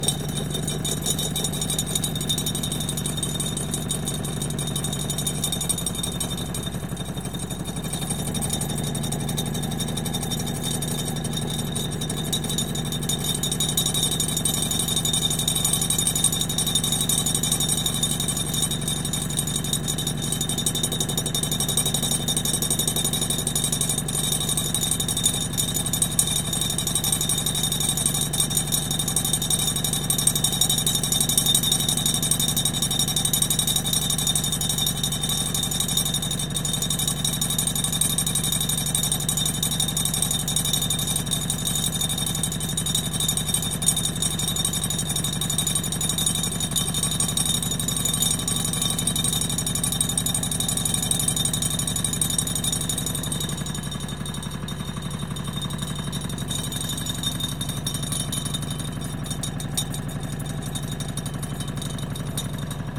washing machine, centrifugue